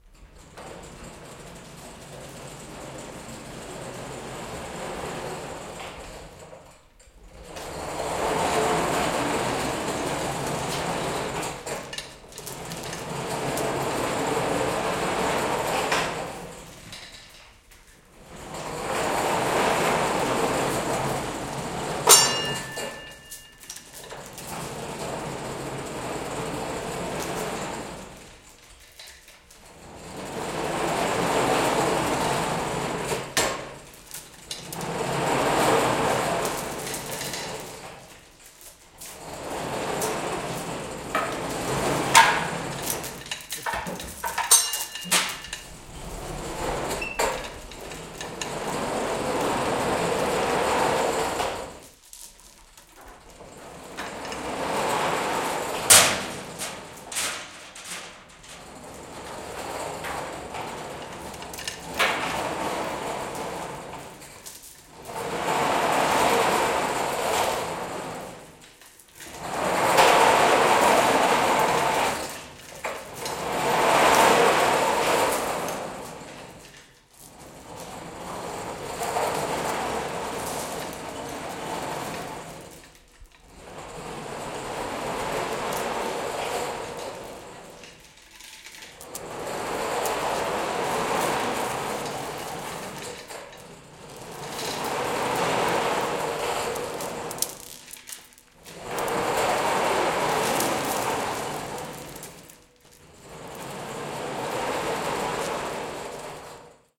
metal shop hoist chains thick rattle pull on track back and forth
chains, hoist, metal, pull, rattle, shop, thick, track